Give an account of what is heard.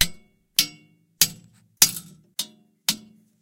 striking an iron bar with another tiny iron bar